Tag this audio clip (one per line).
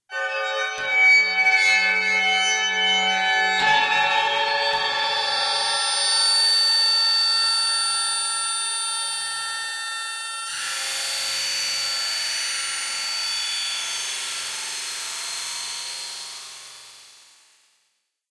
unpleasant
high
horror
sharp
nasty
tone
eerie
creepy